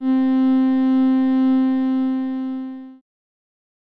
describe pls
A synthesized cello sound created through AudioSauna. I'm not sure I'll ever find a use for it, so maybe you will. No claims on realism; that is in the eye of the beholder. This is the note G sharp in octave 3.